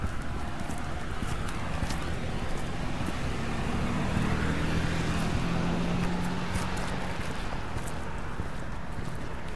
driving car loop
car driving by while me & my friend walk
automobile, field-recording